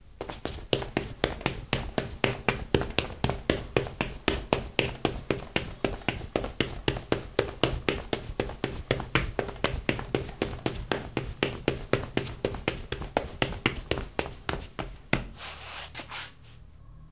corriendo loseta
Corriendo en loseta. Running on tile.
running, footsteps, tile, pasos, corriendo, loseta